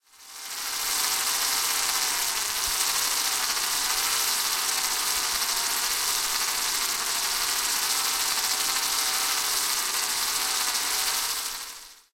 sound of screwdriving, ZOOM H6